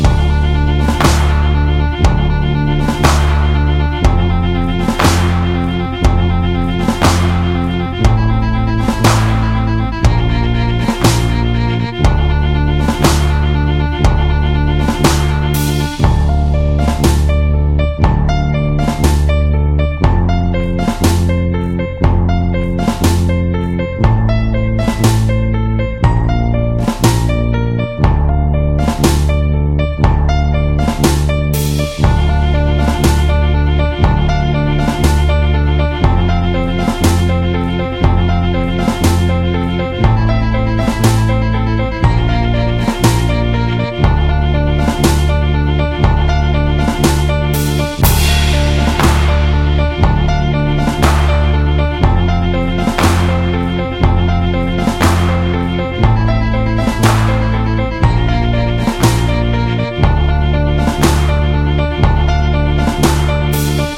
Loop Little Big Adventure 01
A music loop to be used in fast paced games with tons of action for creating an adrenaline rush and somewhat adaptive musical experience.
battle, game, gamedev, gamedeveloping, games, gaming, indiedev, indiegamedev, loop, music, music-loop, victory, videogame, Video-Game, videogames, war